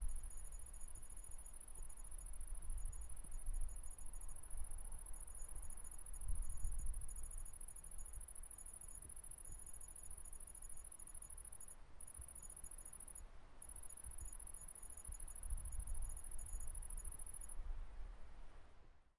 Sound of grasshoppers. Recorded with a Zoom H1 and a Furryhead.